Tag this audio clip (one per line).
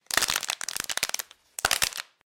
plastic
hand
loud